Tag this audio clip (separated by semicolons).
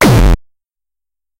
compressors; Kick; sample; distortion